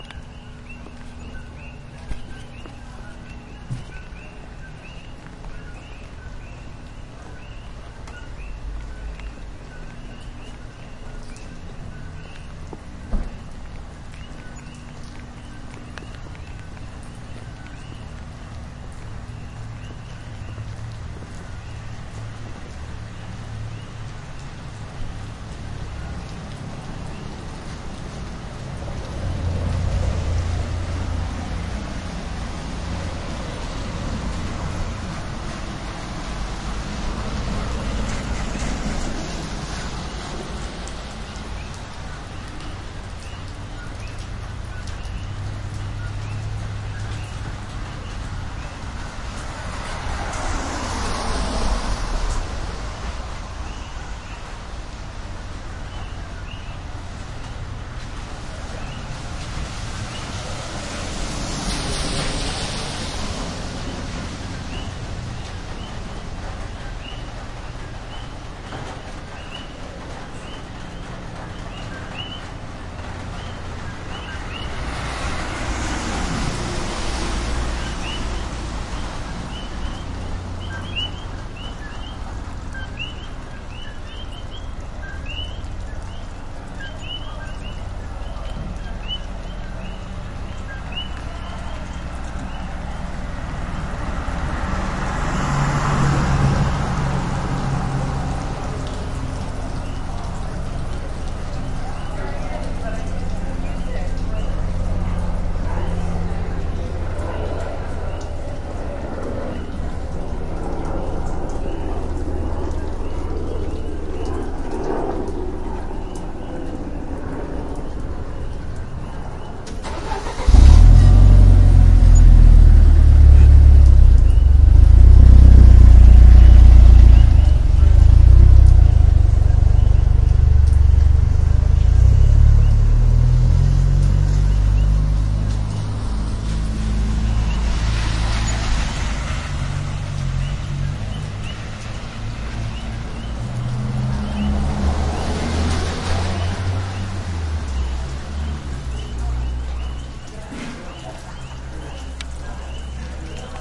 Coquis in the rain - Hawaii
This is a recording of Coquis made using a Roland R26.
These little frogs come from Puerto Rico but have made a home in some of the towns on Hawaii Island (Big Island). This recording was made on a summers night in Hilo.
coqui, coquis, field-recording, frogs, hawaii, nature, Puerto-Rico